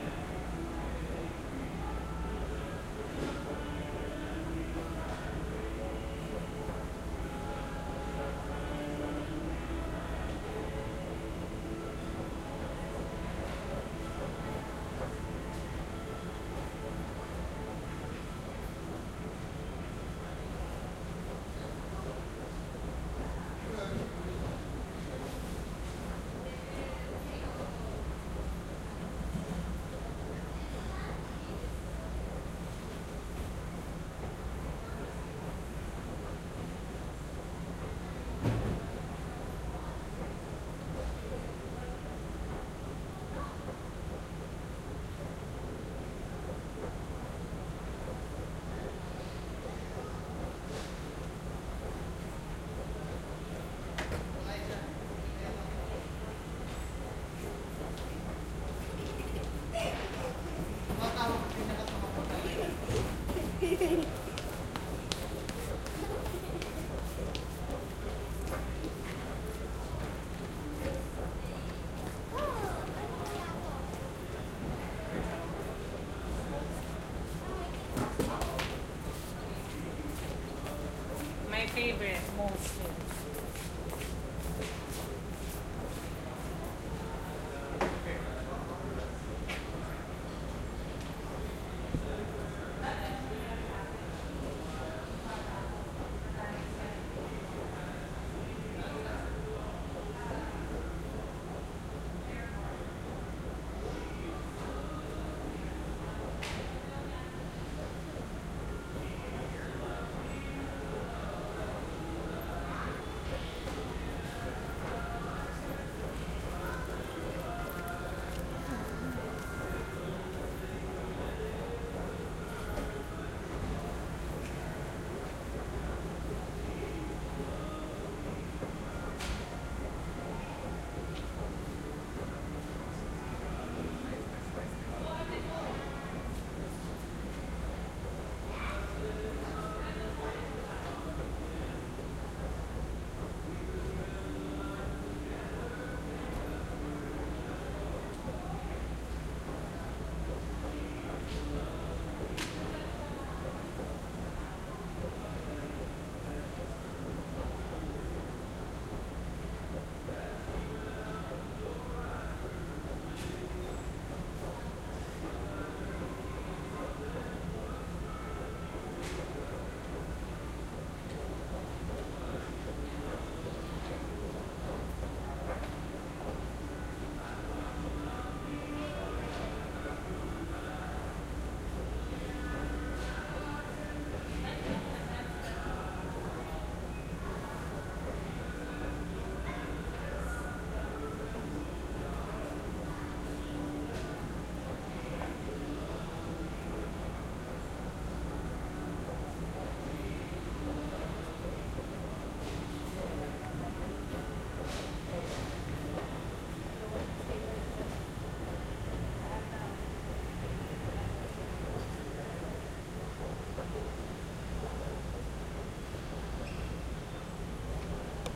Inside Store 1
A recording of inside a store at night.
inside, night, field-recording, people, store